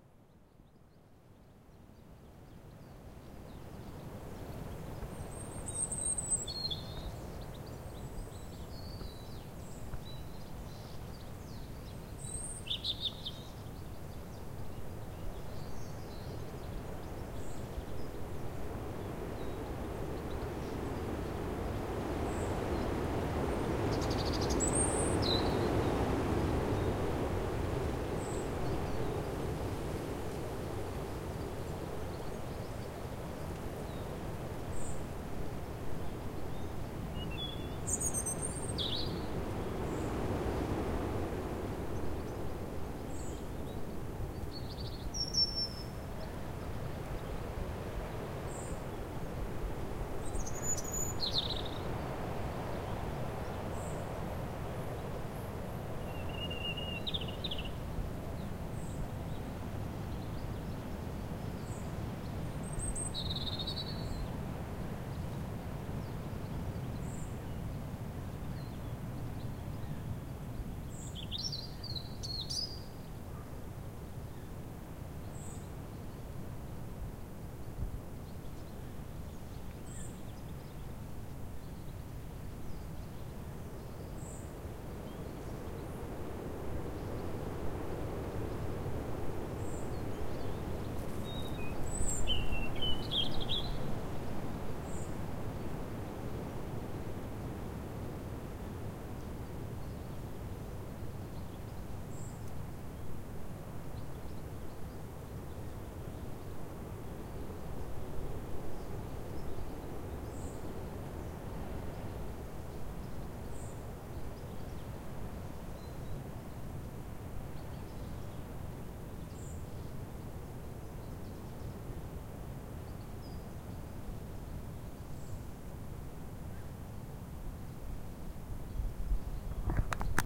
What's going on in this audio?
Windy UK Woodland in late Winter with European Robin singing
Unprocessed wildtrack audio recorded with a Tascam DR-22WL with a Rycote softie on the ground in a woodland in North Somerset (Weston-super-Mare) in late winter. There was a strong wind in the canopy above, which was ebbing and flowing throughout the recording. Buds were just starting to burst on smaller tress and this was recorded at 11AM in the morning, during a lull while no visitors were walking through the area. The surrounding vegetation was mostly large beech trees, with undergrowth of ash and a very big holly bush, from which a European Robin (Erithacus rubecula) was singing. A pretty clean recording, if you're looking for something wind the sound of wind in it, but otherwise the wind is quite loud and might be distracting. A second version was recorded just before this, and is included in this same collection.